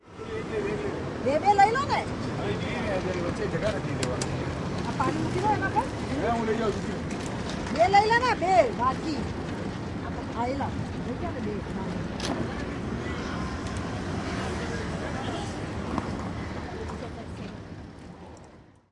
Napoli Molo Beverello Tourists
windshield
Napoli's main civil port.
Tourists from Southeast Asia waiting to leave.
boat, daylight, field-recording, italy, napoli, napolitan, people, port, traffic